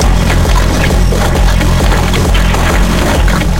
this is a experimental loop at 133,333bpm.
liverecorded sounds,some bass n kicks arranged to a pattern of 16 steps in fruityloops studio and routed to several fx-plugins like reverb,chorus,phaser,flangus,a plugin called metallurgy,filter,limiter,noisegate,compressor,parametric eq,delay,a waveshaper,fast distortion and a vocoder.
analog, electronic, experimantal, filter, glitch, loop, multisample, noise, recorded, reverb, seq, sequence, synthetic, vocoder